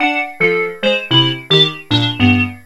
a freehanded introplay on the yamaha an1-x.
freehand, an1-x, syntheline, played, yamaha